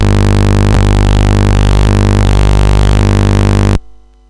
You know these Electronic Labs for kids & youngsters where one builts electronic circuits in a painting by numbers way by connecting patch-wires to springs on tastelessly colourful boards of components?
I tried and recorded some of the Audio-related Experiments - simple oscillators, siren, etc. from a Maxitronic 30 in One Kit.
I did not denoise them or cut/gate out the background hum which is quite noticable in parts (breaks) because I felt that it was part of the character of the sound. Apply your own noise reduction/noise gate if necessary.